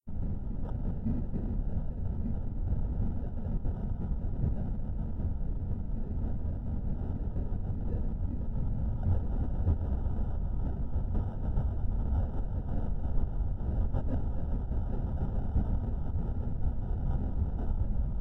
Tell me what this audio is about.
Craft interior ambience
Interior ambience for a plane etc.
plane, hum, craft, ambience